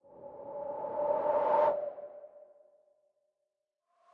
Whoosh Simple ER SFX 2
swosh, swish, woosh, air, whoosh, long, soft, swoosh, transition